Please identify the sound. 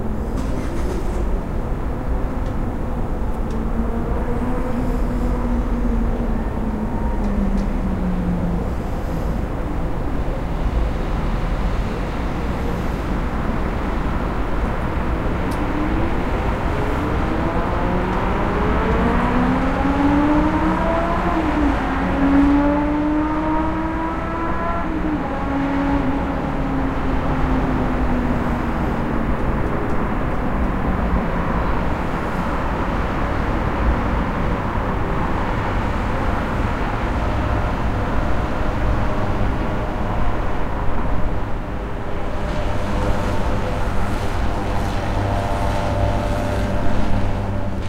Sample outside a Morocccan hotel with a motorbike accelerating past.
accelerating, engine, motorbike